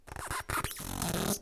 Queneau grincement 01
grincement plastique avec un doigt
psychedelic, vibrating, bizarre, plastic, dreamlike